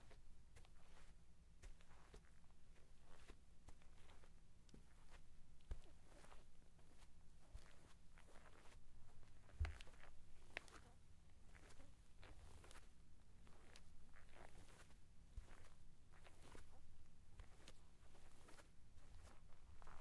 footsteps fast then VERY slow (rob)
walking around on typical office carpeting in dress shoes
carpet, fast, floor, footsteps, office, shoes, slow, steps, walk, walking